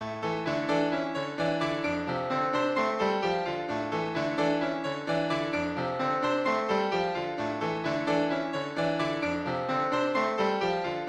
Happy Loop #1
A happy loop made in FL Studio. Sound inspired by Silverchair's song "Abuse Me".
2019.
inspired, 90s, piano, alternative, rock, song, happy, silverchair, grunge, freak-show, dance, loop, music, hope, version, beat